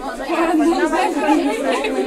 Sound belongs to a sample pack of several human produced sounds that I mixed into a "song".
laugh, laughter, mixing-humans